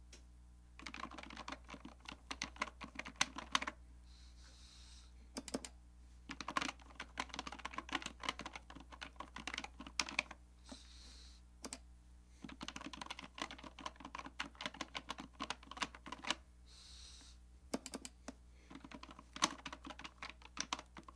Foley of typing on a keyboard and clicking a mouse made with a rock band guitar and a plastic cup.